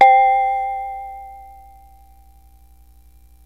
Fm Synth Tone 17
fm, portasound, pss-470, synth, yamaha